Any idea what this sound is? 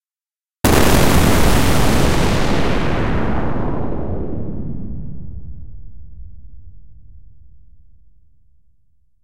spaceship explosion7
made with vst intrument albino
fx, explosion, futuristic, fighting, rumble, atmosphere, noise, sound-design, gun, energy, blast, spaceship, torpedo, laser, fire, shooting, soldier, space, weapon, impact, war, future, shooter, military